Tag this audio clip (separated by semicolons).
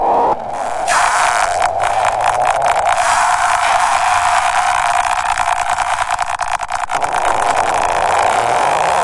2-bar; ambient; busy; electronic; glitch; industrial; intense; loop; noise; sound-design; sustained